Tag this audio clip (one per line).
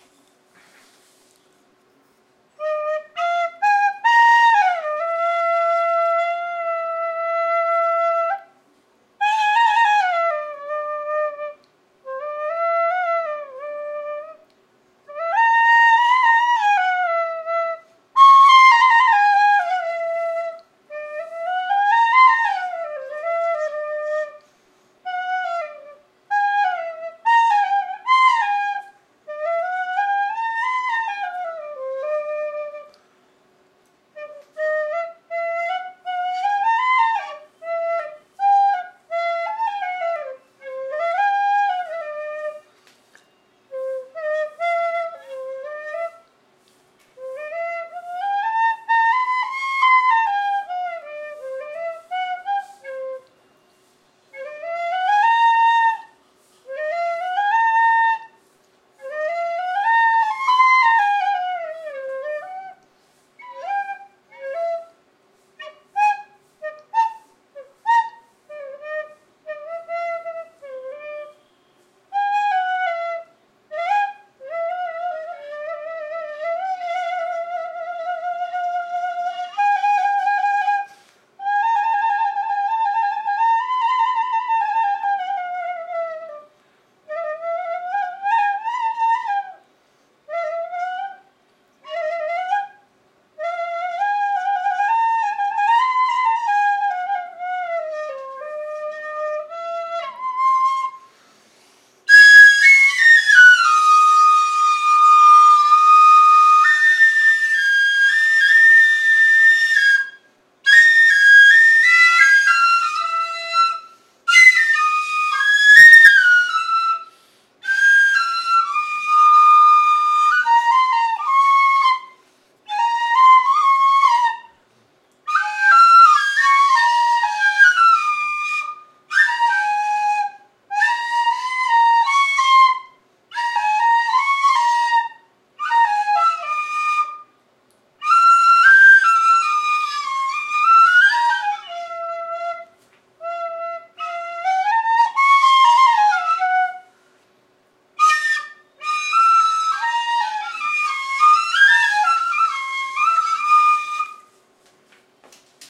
bamboo
flute
india